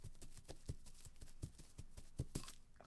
Small Animal Running on Grass
Just a small animal stepping on grass